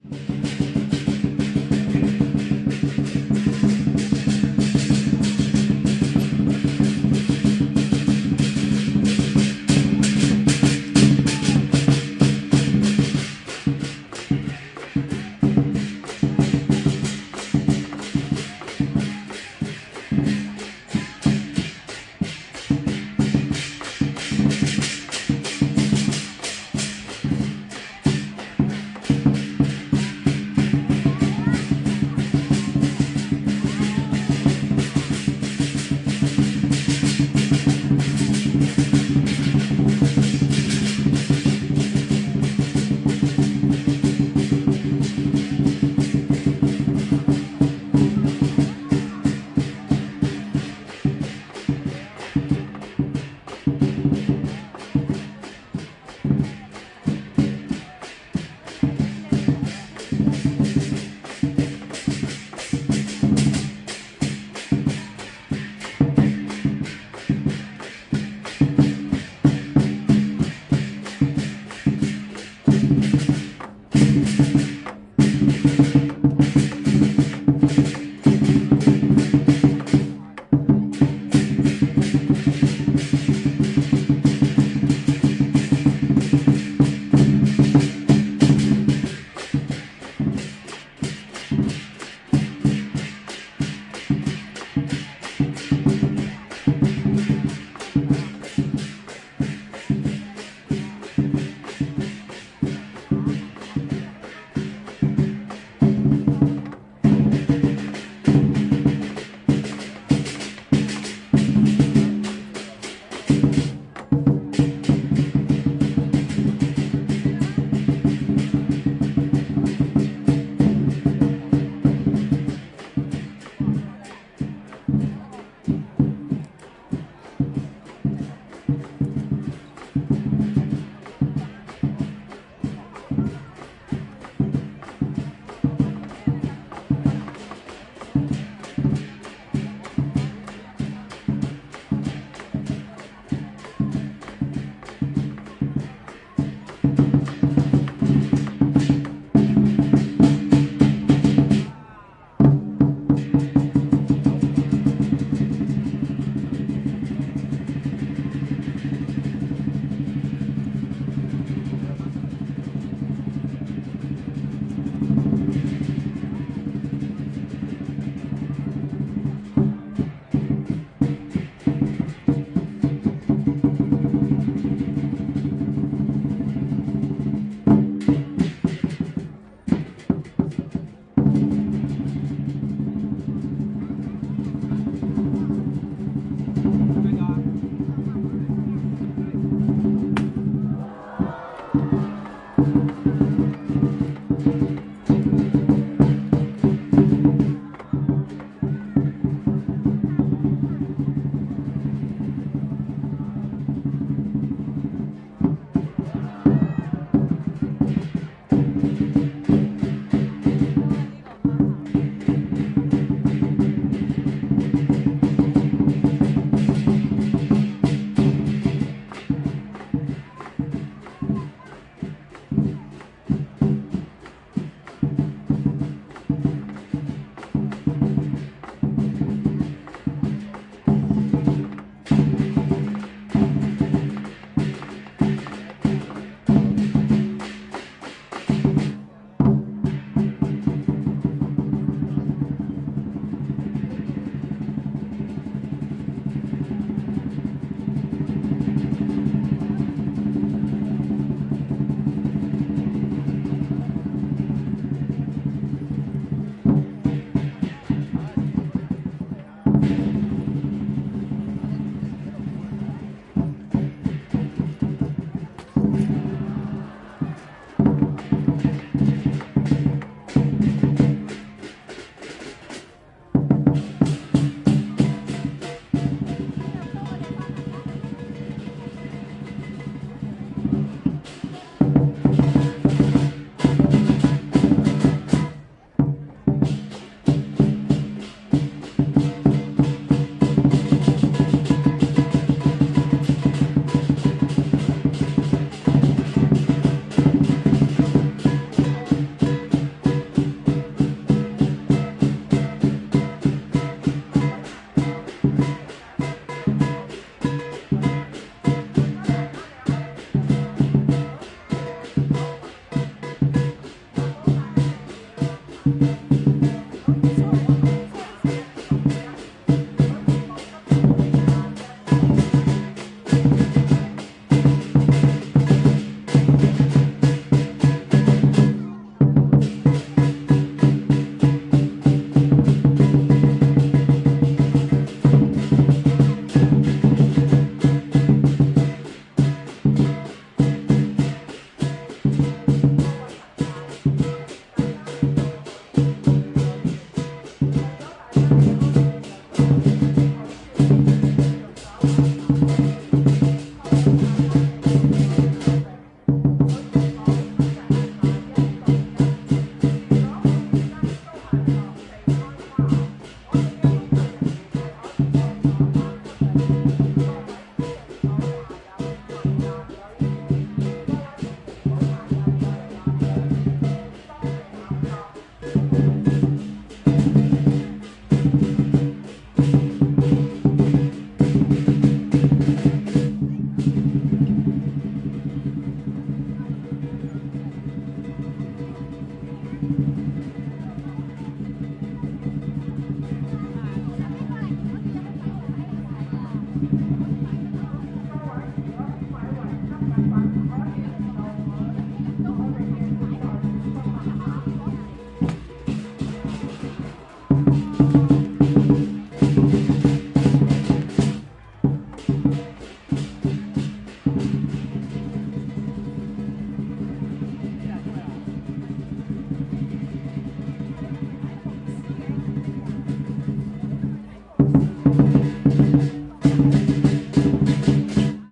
Dragon dancing at Well Wishing Festival, Lam Tsuen, Hong Kong. (1st file)
I made this recording during the 3rd day of the lunar calendar at Well Wishing Festival, held in Lam Tsuen, New Territories, Hong Kong.
This file is the first of 4 recordings I made while a dragon was dancing to celebrate Chinese new year. You can hear the drummers playing while the dragon dances, jumps, and throws leaves and/or shinny papers from its mouth to bring good luck. You can also hear people talking and shouting when the dragon jump or throw the leaves or the shinny papers, and in the background, announcements broadcasted through speakers from times to times.
Recorded in February 2019 with an Olympus LS-3 (internal microphones, TRESMIC system on).
Fade in/out and high pass filter at 160Hz -6dB/oct applied in audacity.